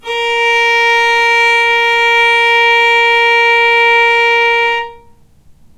violin arco non vib A#3
violin arco non vibrato